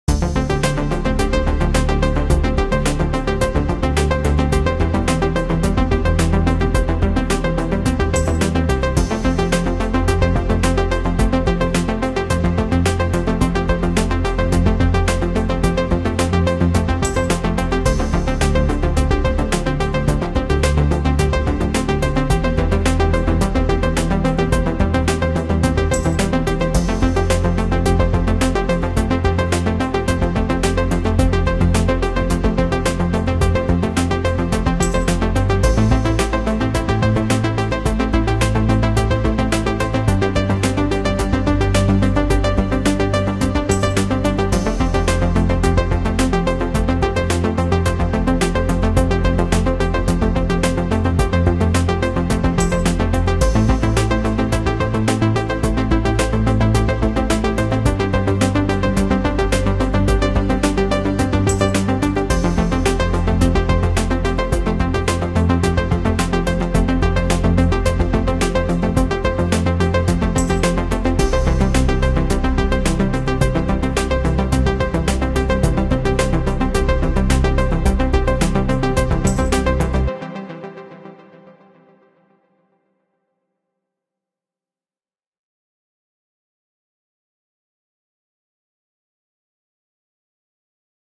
Mysterious Things (Indefinite table remix)
Thank you for listening.
My recording studio is basically a computer with a controller keyboard and a MK3 Micro. All sounds and instruments are created with Virtual Studio Technology (VST) plugins. I do my best to master the recordings I upload, but some of the older recordings lack mastering.
Sound library: Native Instruments KOMPLETE 13 ULTIMATE Collector’s Edition. U-He Diva.
Sequencer: Native Instruments Maschine or Waveform.
Mastering: iZotope plugin.
This results in a high-pitched squeaking sound in the audio.
audiolibrary, slow, melancholic, podcast-music, music, intro, cinematic, sad, inspiring, background, repetition, piano, positive, loopable, vlogmusic, movie, film, outro, finale, podcast